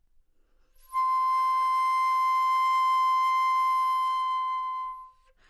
overall quality of single note - flute - C6
Part of the Good-sounds dataset of monophonic instrumental sounds.
instrument::flute
note::C
octave::6
midi note::72
good-sounds-id::129
dynamic_level::p
C6, flute, good-sounds, multisample, neumann-U87, single-note